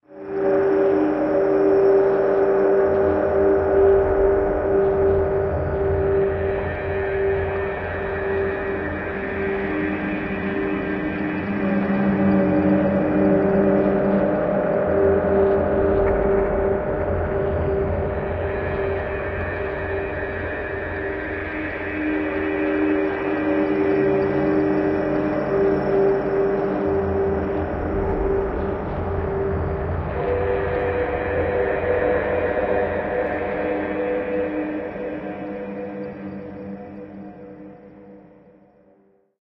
Weary Traveller
Made for Westgate Sounds vst "Wraith"
Thanks to those who are helping to make the soundpack loopable!
Tools used - Project Bravo, Absynth 5, Alchemy, and Massive
ambient, dark, dystopia, wraith